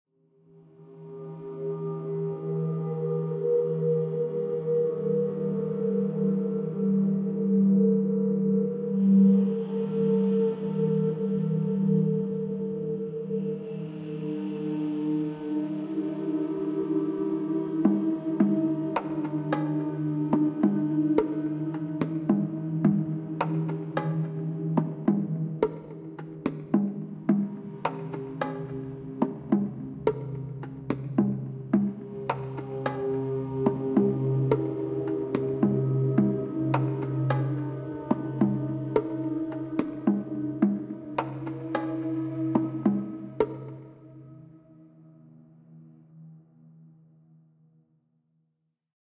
Could be a calm scene before an ambush, before a big revolt, or a stealth level of a game.
Heavily processed and slowed down flute from JamieWilson1112, at various pitch to multiply the layers:
And processed bongo samples from the pack of KJose: